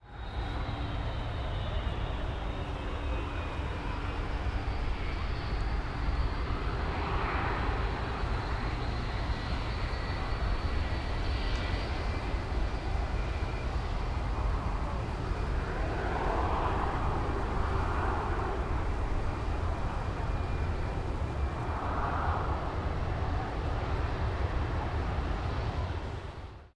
Runway Ambience
Planes at LAX sitting on the runway.
Runway, Field-Recording, Planes, Plane, Jet, Transportation, Aircraft